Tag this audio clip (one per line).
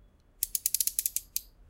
pocket
compact
stiletto
knife